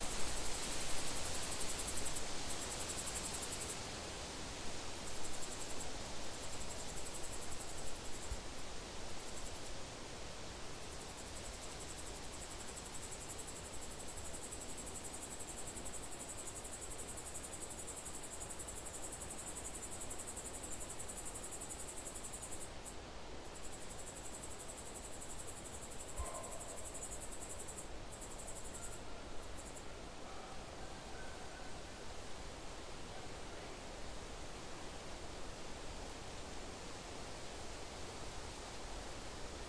Early autumn forest. Noise. Wind in the trees.
Recorded: 2013-09-15.
XY-stereo.
Recorder: Tascam DR-40